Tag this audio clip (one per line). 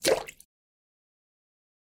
pouring
pour
Run
aqua
Slap
bloop
Drip
wave
Splash
Movie
Running
blop
Lake
marine
Water
Sea
crash
Game
River
Dripping
aquatic
Wet